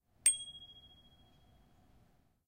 A single strike of a bike's bell. Very resonant but short lived. Recorded on Stanford Campus, Saturday 9/5/09.